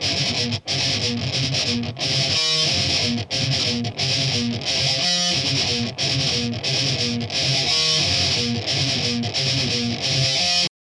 THESE ARE STEREO LOOPS THEY COME IN TWO AND THREE PARTS A B C SO LISTEN TO THEM TOGETHER AND YOU MAKE THE CHOICE WEATHER YOU WANT TO USE THEM OR NOT PEACE OUT THE REV.